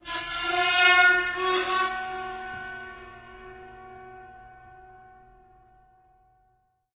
ice door 2
recordings of a grand piano, undergoing abuse with dry ice on the strings
abuse, dry, ice, piano, scratch, screech, torture